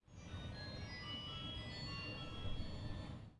A distant phone ringing on a subway station.